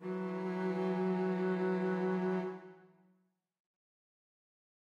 Double Bass (5th+7th)
These sounds are samples taken from our 'Music Based on Final Fantasy' album which will be released on 25th April 2017.
Bass, double, Double-Bass, Music-Based-on-Final-Fantasy, Samples